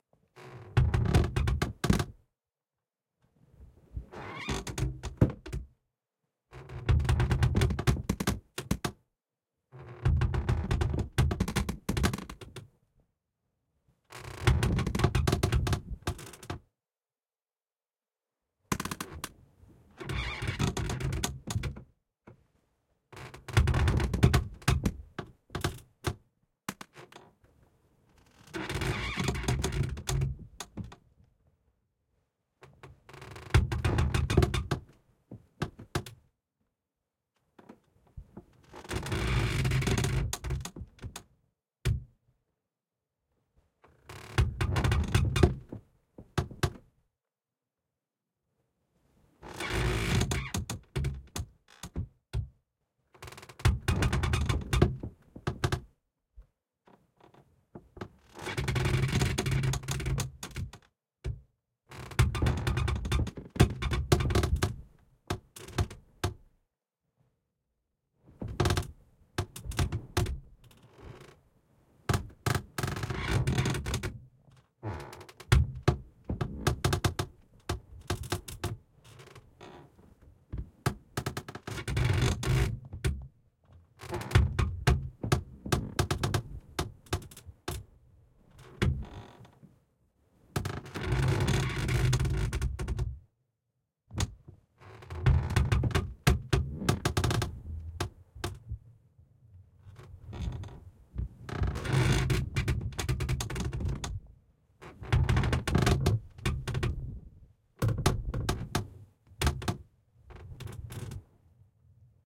bed squeaks many
Squeaky IKEA double-bed, many versions.
PZM-> ULN-2.
Foley, many, sleeping, squeaking, squeaks, squeaky